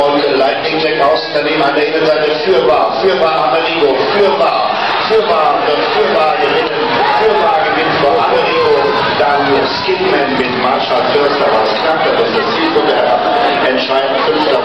Pfrederennen Horses Race
Recorded during horse racing in Krefeld (Ger) 2009
horse, gallopp